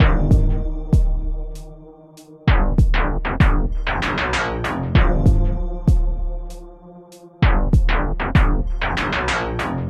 Grungy FM driven loop at 97bpm, made on a Korg Opsix